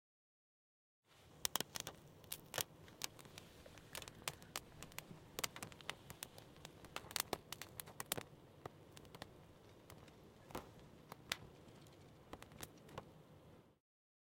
03 - Burning a plastic bag
Burning plastic bag.